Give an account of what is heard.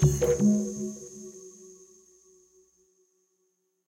OS Start
a startup sound for an imaginary operating system
boot, system, sfx, blip, os, bootup, ui, startup, operating, operating-system